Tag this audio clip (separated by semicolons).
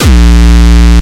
kick; distortion